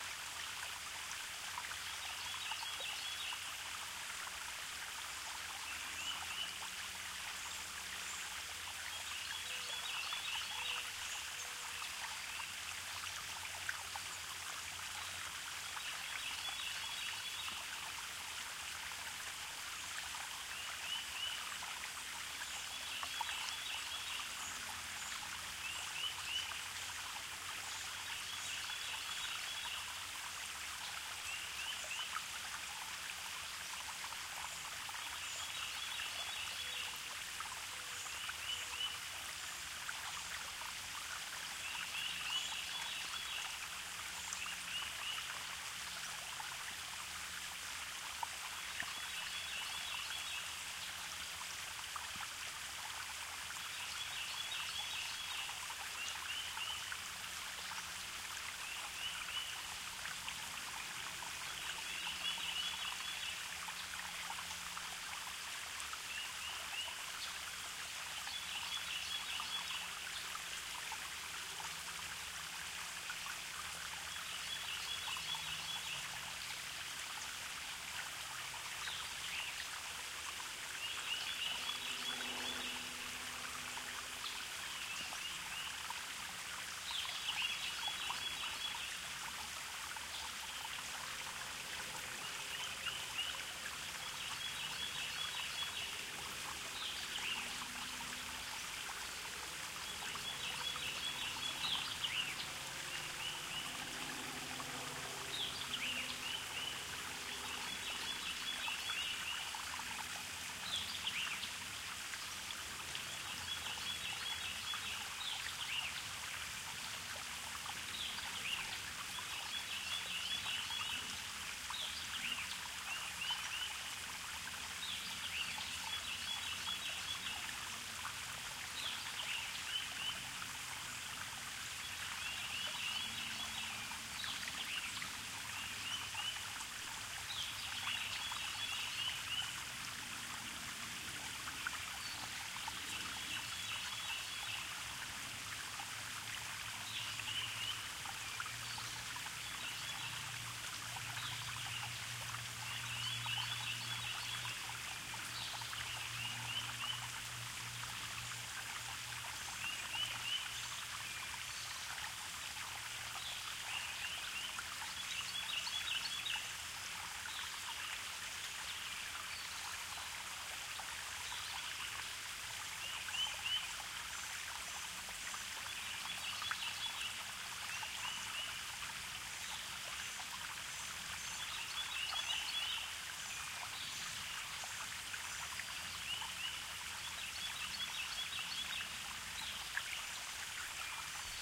SpringCreekDeepWoodsWithBirdsMay6th2018
A small creek deep in the forest in the Midwest U-S-A.
This was recorded on Sunday May 6th, 2018 using the Sound Devices 702
and the AudioTechnica BP4025 STEREO microphone.
Nothing like the peaceful sound of a creek with the birds being active.
Woods, Peace, Forest, Creek, Nature, Water